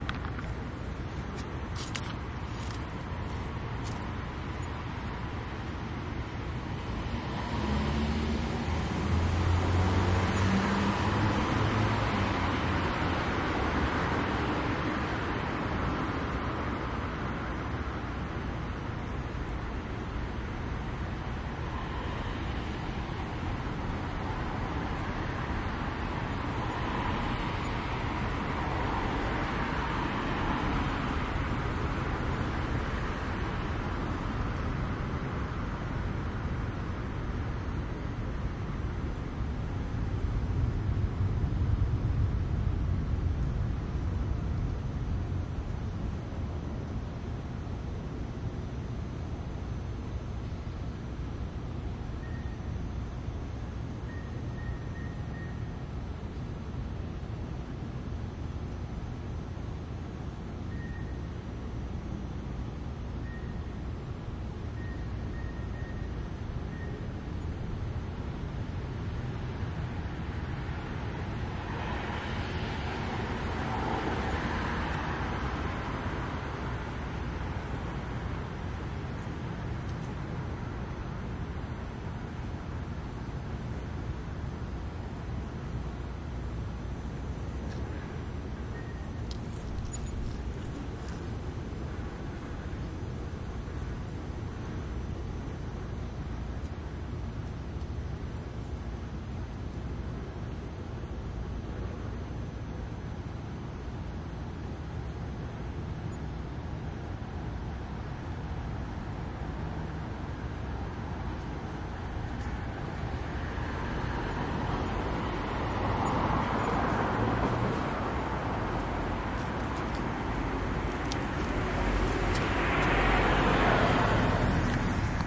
Mexico City - Durango Avenue MS
Ambience Recorded with an Zoom H2N In Quad Mode (This Is The MS File)
Mexico City, Durango Avenue, Midnight
Mexico, Ambience, City, Street